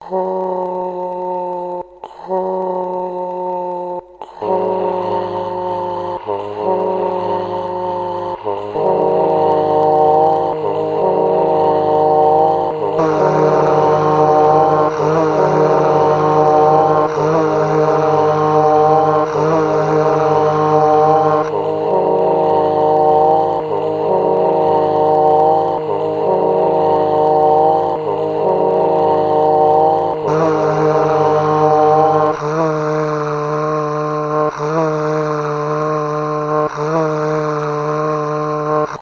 Recorded with an old sony microphone (I can't dig up the name) into a korg kaoss pad3.Vocals were laid on three pads.Then played into Cubase. Live performance.Nothing was altered in Cubase except for the EQ.
dreamy, hymn, iceland, larusg, voice